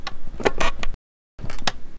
ksmarch-chairsqueak1
One of three chair squeak noises I recorded three years ago for a radio drama project. This one's probably best used for people sitting down.